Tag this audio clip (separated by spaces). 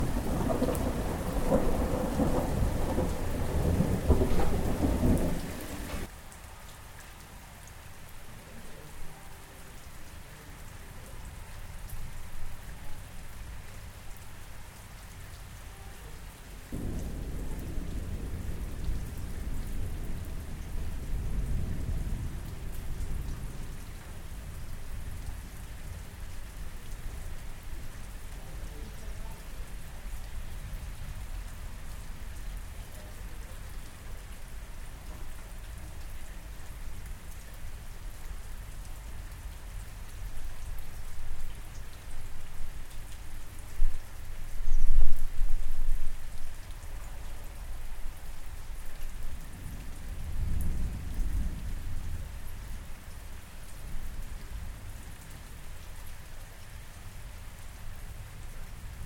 rain rainstorm storm thunderstorm